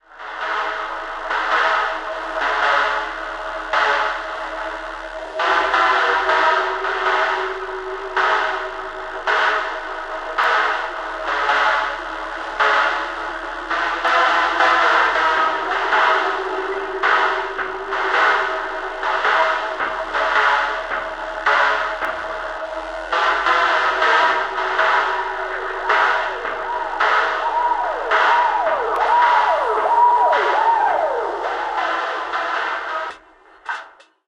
A sound that can be used for a getaway scene.